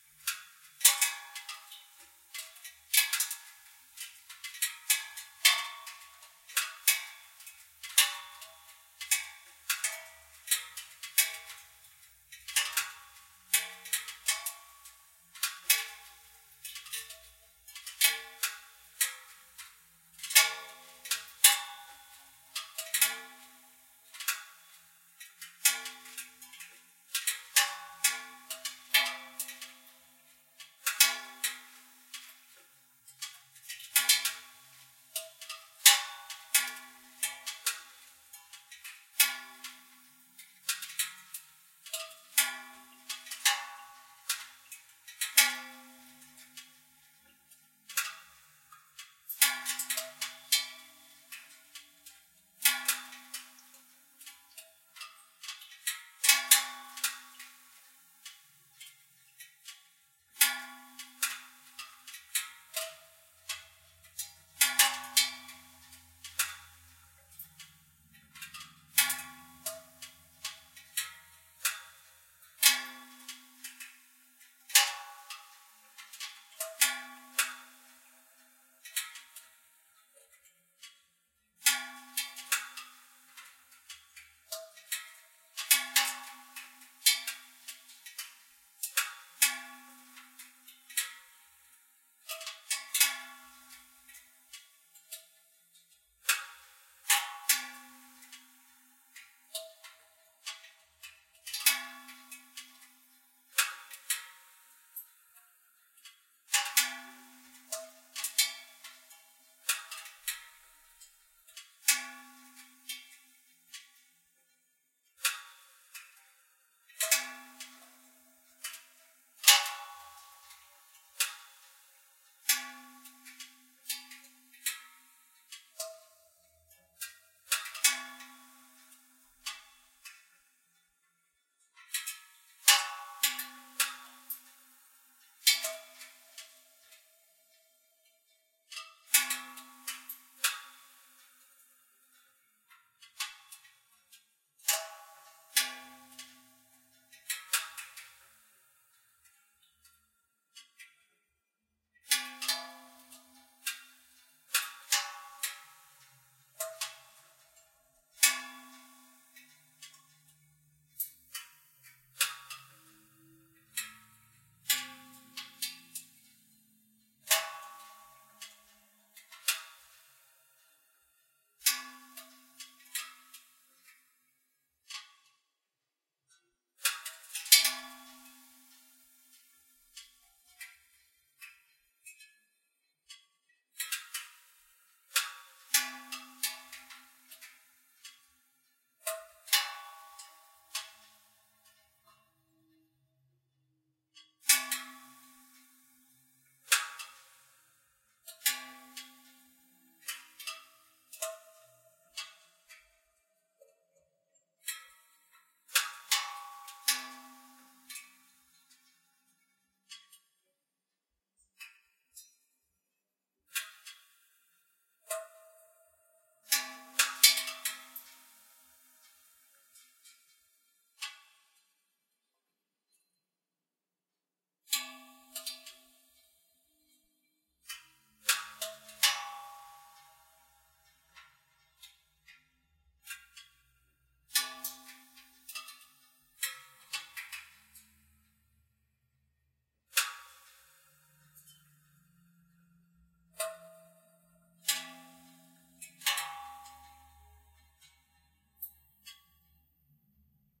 The underside of my car after ending a trip. Different parts are clicking at different rates as they cool. Kind of interesting. Might even be useful for something. Recorded with H4n and its built-in mics.